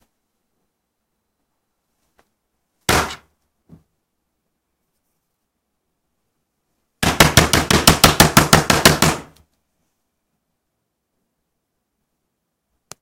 I recorded this in my house, with Galaxy Note 2 - and made the sound by hitting a metal desk wtih my hand (it was really hurt!)
First I bang it once, and then I bang it several times.
bang, desk, hand, house, metal